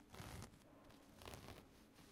Scratching a carpet
carpet, scratch